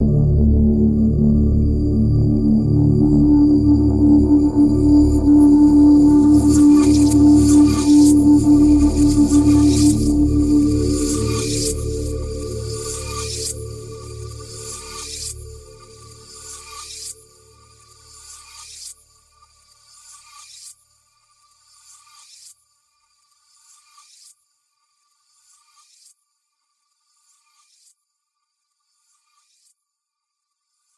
A very dark and brooding multi-sampled synth pad. Evolving and spacey. Each file is named with the root note you should use in a sampler.
dark, granular, multi-sample, multisample, ambient, synth